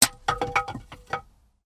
Falling logs in a woodshed 06
Falling logs in a woodshed
Recorded with digital recorder and processed with Audacity